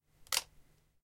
Camera Flash, Lift, D
Raw audio of lifting up the built-in flash light on a Nikon D3300 camera.
An example of how you might credit is by putting this in the description/credits:
The sound was recorded using a "H1 Zoom V2 recorder" on 17th September 2016.
flash, nikon, lift